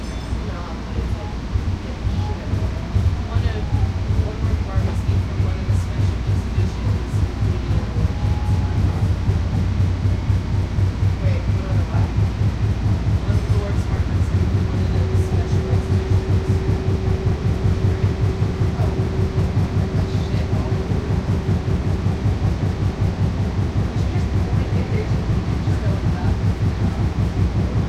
nftp wstend 0408 trainstart
This is part of the Dallas-Toulon Soundscape Exchange Project; Location: On DART- In Transit; Time: 10:37AM; Density: 3 Polyphony: 3 Chaos/order: 5 Busyness: 3; Description: Riding DART train through underground tunnel to City Place station. We're deep enough that my ears are about to pop. The train is full, but quiet except for a group of 3 teenagers.